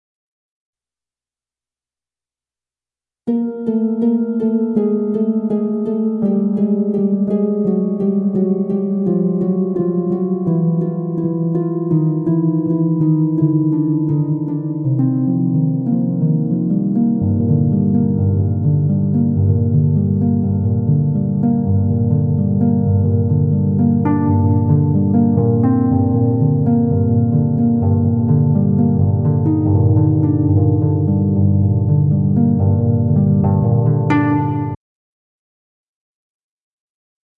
Menacing descending harp. Horror Genre
horror, fx, Menacing, harp, descending